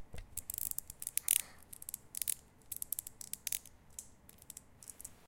rubbing a metal chain
field-recordings, sound